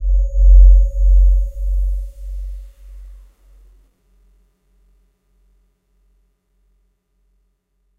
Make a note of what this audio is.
Not that is was that important after all considering the fact that the patch itself has a grainy character in the higher frequencies... No compressing, equalizing whatsoever involved, the panning is pretty wide tho, with left and right sounding rather different, but in stereo it still feels pretty balanced i think.
bass, multi-sampled, space, synthetic